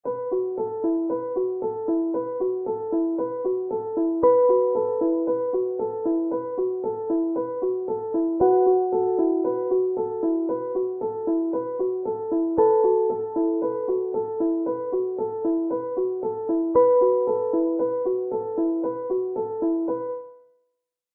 Soft suspense music
A soft and gentle suspense music.